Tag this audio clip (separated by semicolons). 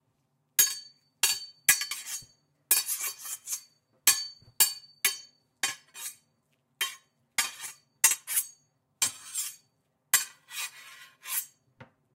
battle; fight; metal-on-metal; owi; sword